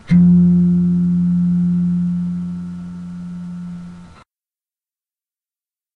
lofi guitar
guitar, lofi, note